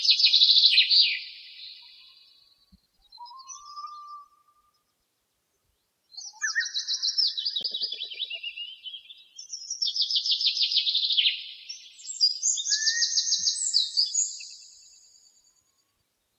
A mono field recording of a finch and some other bird (I don't know what kind). There are also some other finches singing in the background.
field-recording, finch